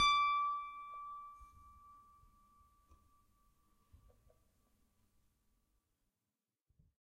a multisample pack of piano strings played with a finger
fingered, multi, piano, strings